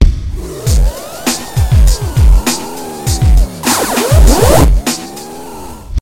Drone with some 8bit electronic effects and a dance background beat. Created with various software, including Adobe Audition and Audacity.
8bit, artificial, fx, imaging, jingles, noise, radio, science-fiction, sci-fi, sfx, sound, voiceover, weird